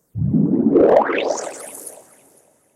HITS & DRONES 14
Sound, broadcasting, Fx